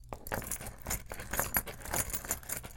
Looking for knife